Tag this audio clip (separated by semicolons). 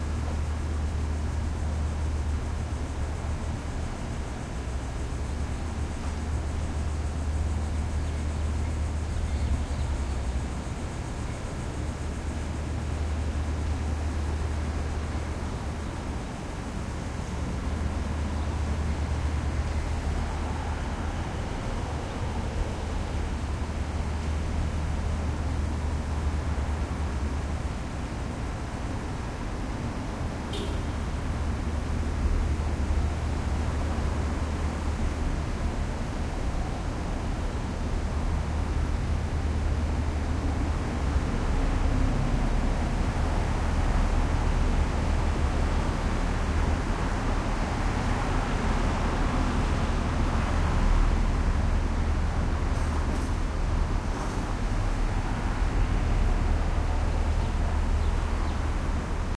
city; field-recording